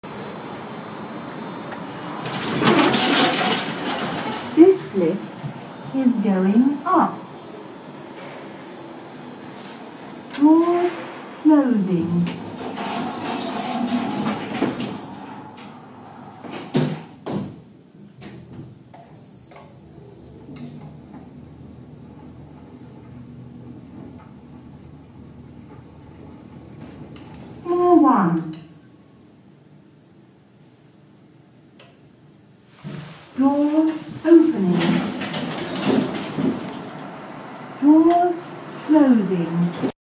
This Lift Is Going Up, Doors Closing.
Recorded on iPhone 6 Plus.